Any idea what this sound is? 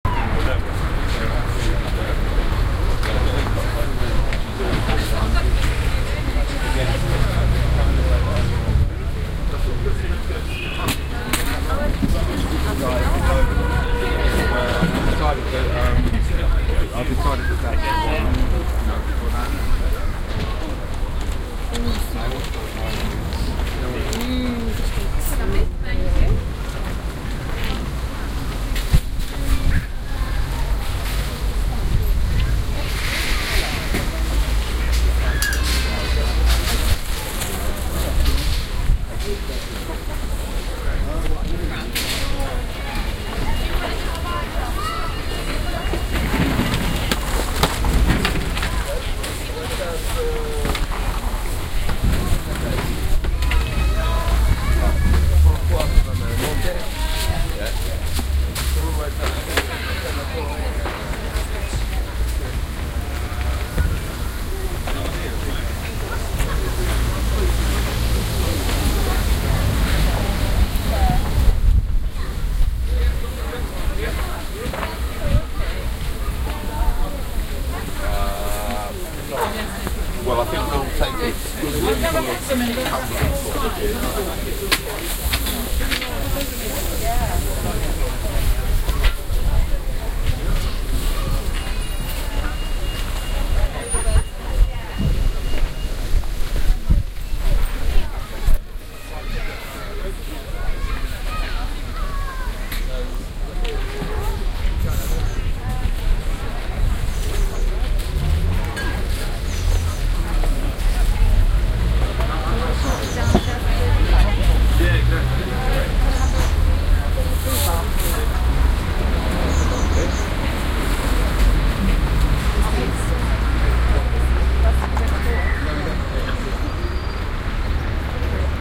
Queens Park - Farmers Market